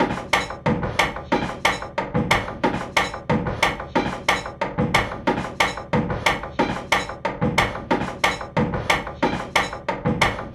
natural sounds recorded with tascam dr 05 and rework with adobe audition,recycle,soundforge 7 and fl studio
beat, breakbeat, drumloops, drums, indus